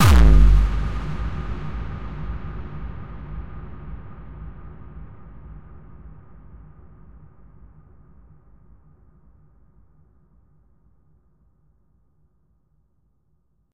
Hardcore boom 1
A deep classic hardcore with a noise head produced with Sonic Charge's MicroTonic VST on a bed of reverb. Kinda hardstyle feeling.